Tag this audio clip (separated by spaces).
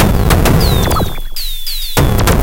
loop electro percussion dance 120BPM ConstructionKit electronic rhythmic